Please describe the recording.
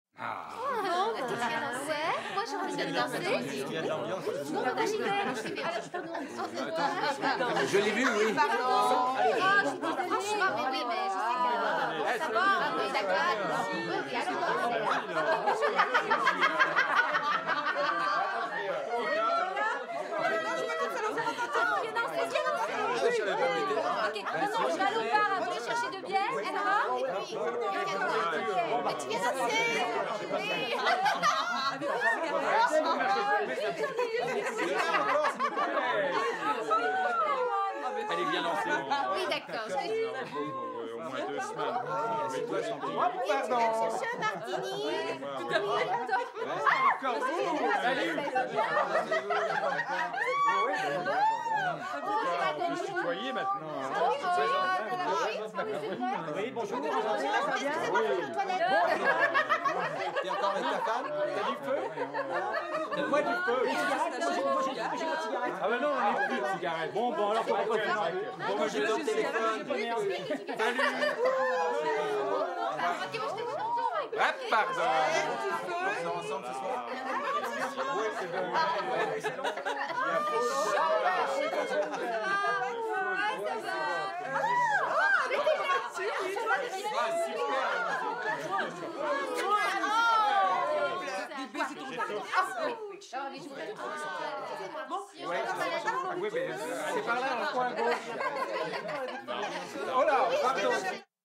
Interior vocal (French) ambiences: party on!
walla, party, vocal-ambiences